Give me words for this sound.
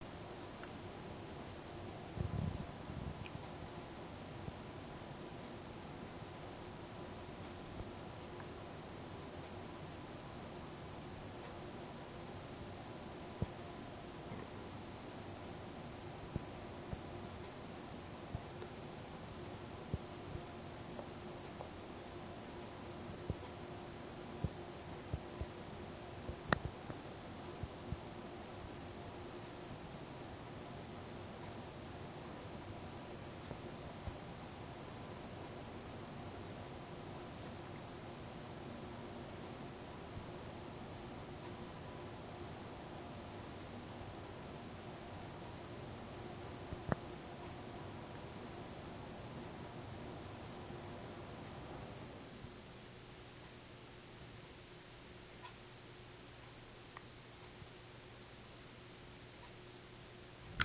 A one minute recording of the inside ambiance of a house. Very quiet with little to no human noise. A heater is faintly heard.